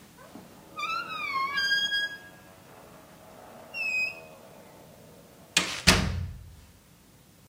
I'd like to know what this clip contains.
Door-squeak-clunk
A door with a slight squeak shutting with a hefty clunk.
Door-clunk, Door-shutting